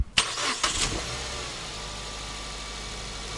carr on
Engine car switched on